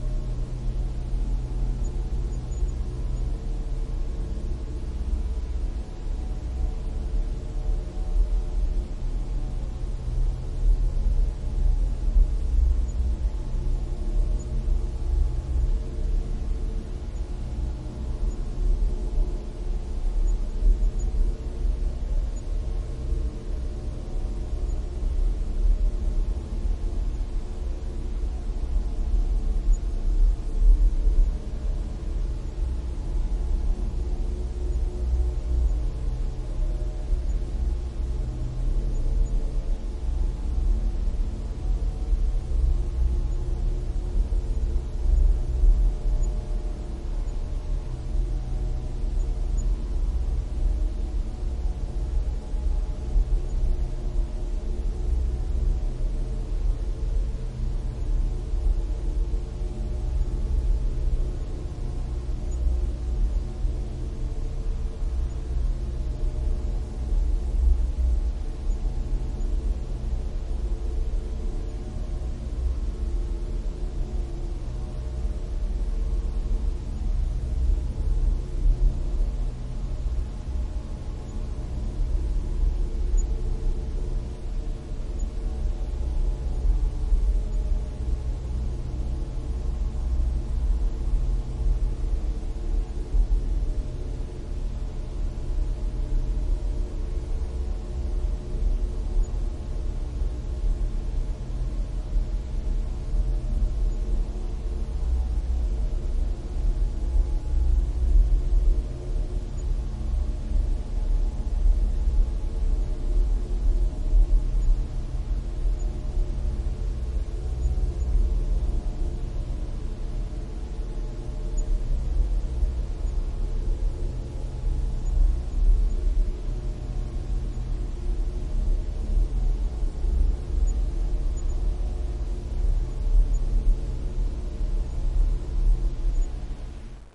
Spooky Drone
This is a creepy drone sound I made in Audacity. I generated noise then reversed/reverbed it mulitple times before lowering the speed.
ambience, background-sound, creepy, haunted, sinister, spooky, white-noise